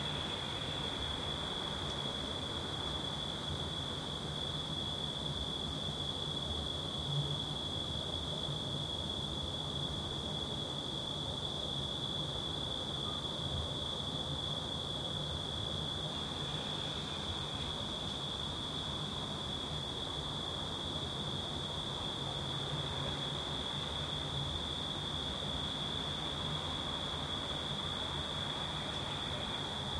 Evening Amb
ambient, atmosphere, background-sound, ambience, soundscape